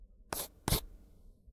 Pen on Paper 03
Pen on paper.
{"fr":"Raturer 03","desc":"Raturer au stylo à bille.","tags":"crayon stylo rature"}
paper, ball, scribbling, striking, pen